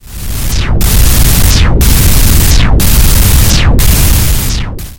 HAMOUDA Sirine 2014 2015 Laser
In first, go to Generate menu and select Noise :
→ Noise type : White
→ Amplitude (0 to 1) : 0.5
→ Duration : 5 sec
Then select Effect and choose for all the track Bass Boost :
→ Frequency (Hz) : 200
→ Boost (dB) : 32
Select the track during the first second, and then go to Effect and choose Fade In. Repeat this action for the last second in the track with Fade Out.
In plus, always in Effect Menu, set an Wahwah Effect to :
→ to 0.5 to 0.8
→ to 1.5 to 1.8
→ to 2.5 to 2.8
→ to 3.5 to 3.8
→ to 4.5 to 4.8
With this settings :
→ LFO Frequency (Hz): 1.5
→ LFO Start Phase (deg) : 0
→ Depth (%) : 70
→ Resonance : 2.5
→ Wah Frequency Offset (%) : 30
Typologie (Cf. Pierre Schaeffer) : N (Continu tonique) + X’’ ( itération complexe)
Morphologie (Cf. Pierre Schaeffer) :
1- Masse: - Son "cannelé"
2- Timbre harmonique: brillant
3- Grain: le son est rugueux
4- Allure: le son comporte un vibrato
5- Dynamique : attaque abrupte et graduelle